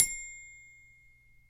Multisample hits from a toy xylophone recorded with an overhead B1 microphone and cleaned up in Wavosaur.
toy, multisample, xylophone, instrument